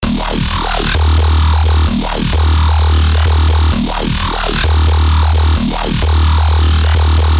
becop bass 1
Part of my becope track, small parts, unused parts, edited and unedited parts.
A bassline made in fl studio and serum.
A long grinding and low talking bassline
loop, fl-Studio, Djzin, low, bass, techno, dubstep, wobble, Xin, grind, electronic